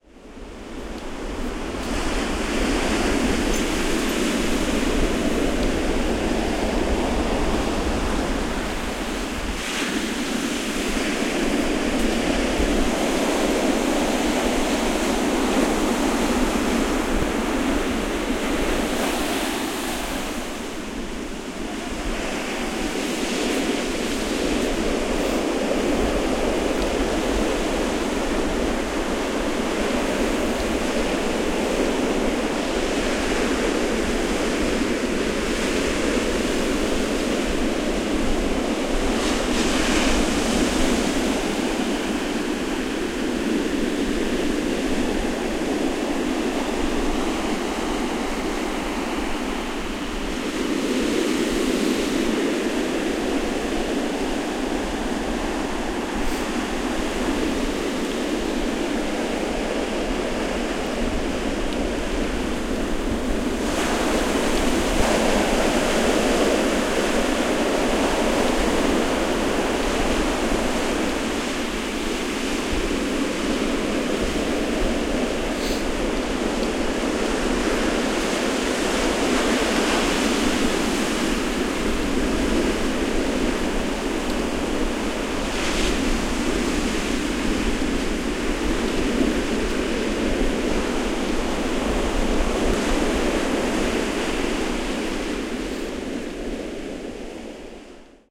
Recorded at Crane Beach near Ipswich, Massachusetts, on the ocean on a windy day with rough surf in early October. Recorded on a Marantz PMD661 using an Audio-Technica BP4025 stereo microphone with a wind cap
surf, waves, field-recording, beach, seaside, ocean